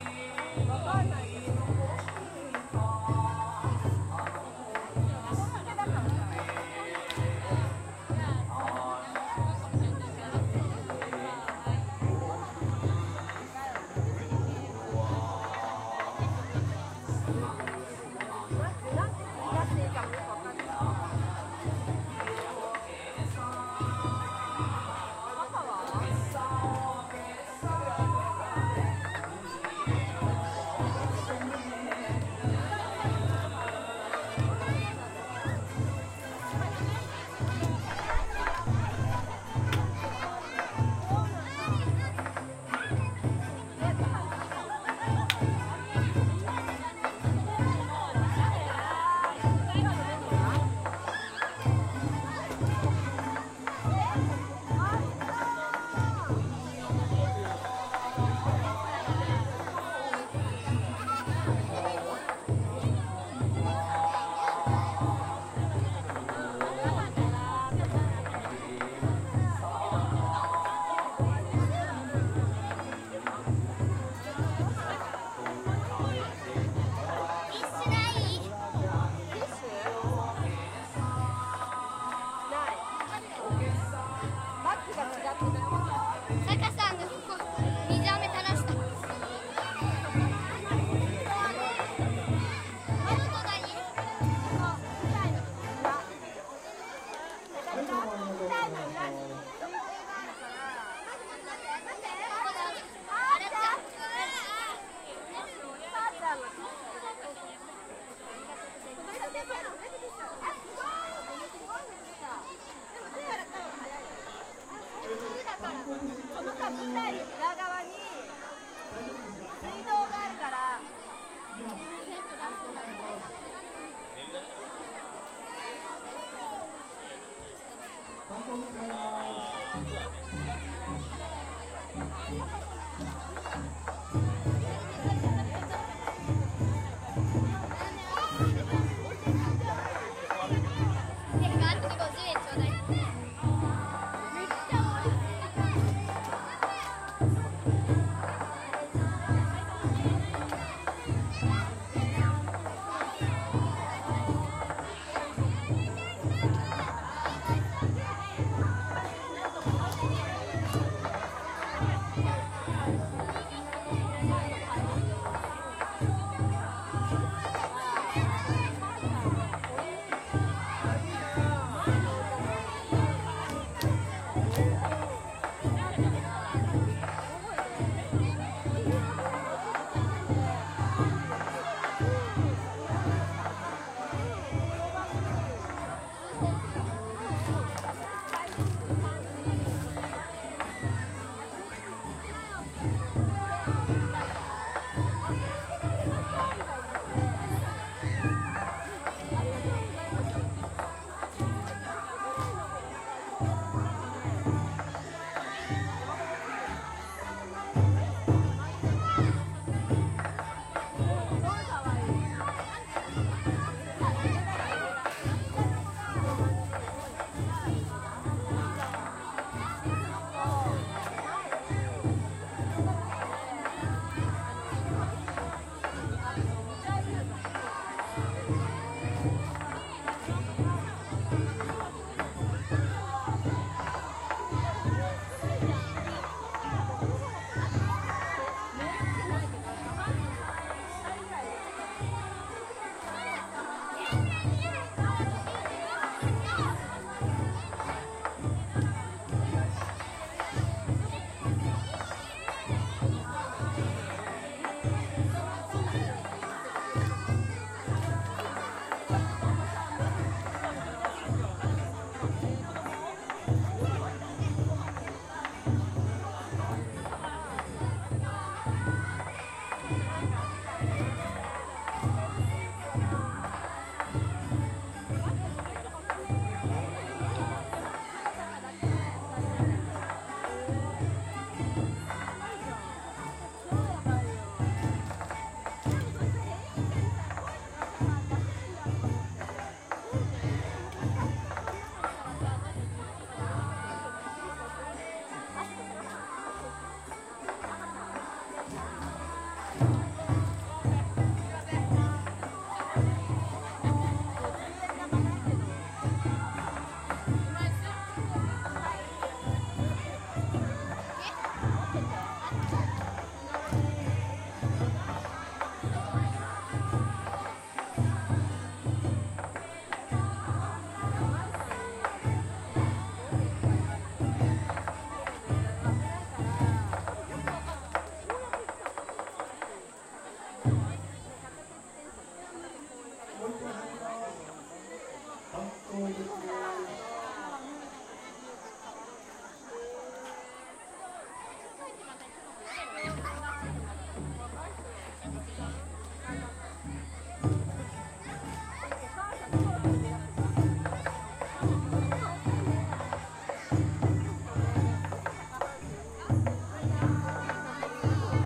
Kitano jinja shrine matsuri
Ambient sounds of small local festival in Kitano Jinja. Music plays, taiko drums sounds, people talinkg. Magome, Tokyo. Raw, unedited, Tascam DR-40
Magome, Tokyo, shrine